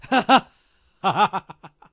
Small outburst of laughter.